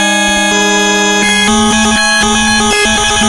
circuitbent Yamaha PSR-12 loop8
bent, circuit, psr-12, sample, yamaha